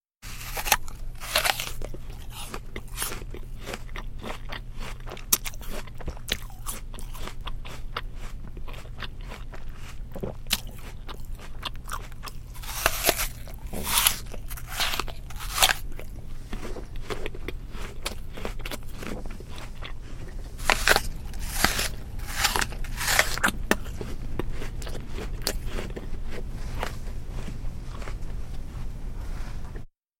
Apple Bite Chew Eat.
Type of mic: Manley
Type of apple: Ligol :)
Apple, Chew, Eat, Bite